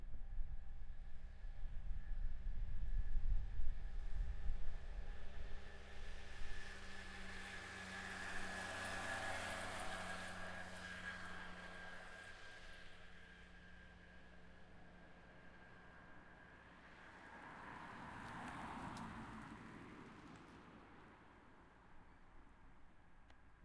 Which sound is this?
a moped driving by
field-recording, moped, sonic-snap